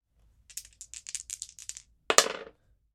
dice roll wooden table 2
two dice being rolled onto a wooden table top
wooden, dice, table, roll